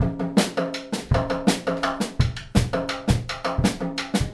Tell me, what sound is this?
afro beat 6 / 8 toms
A 6/8ths drum beat with snare, kick, tom.
afro, beat, drum, drums, kit, supraphonic, toms